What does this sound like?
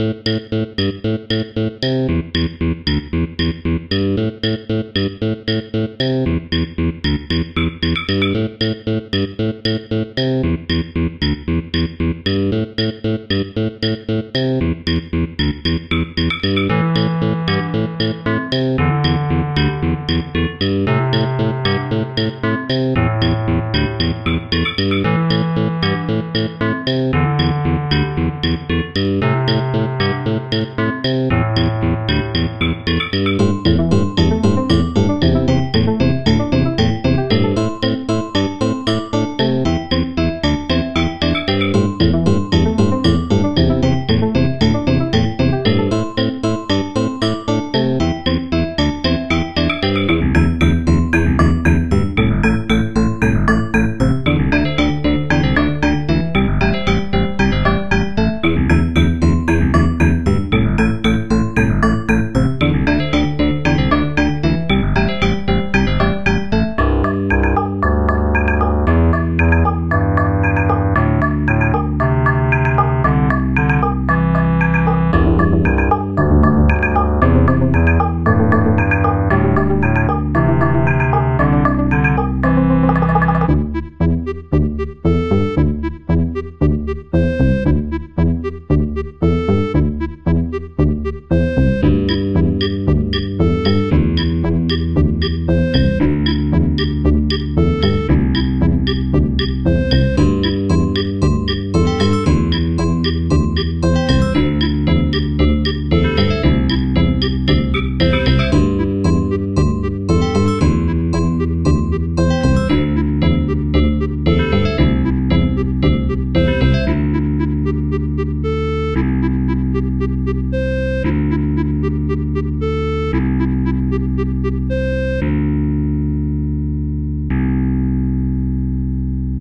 Funny background music #7
You can use this loop for any of your needs. Enjoy. Created in JummBox/BeepBox.
calm, music, soundtrack